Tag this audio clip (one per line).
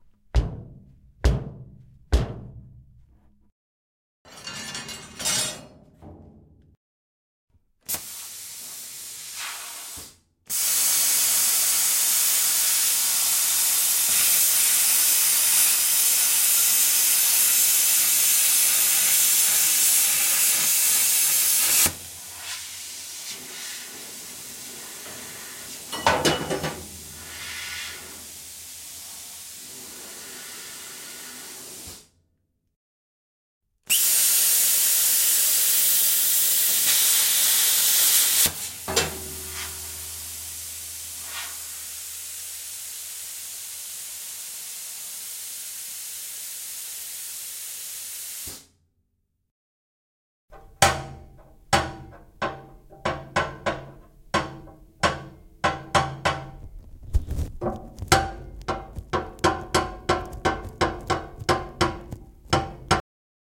ambient
de
Industrial
metal
ntg3
R
r26
Roland
room
sounds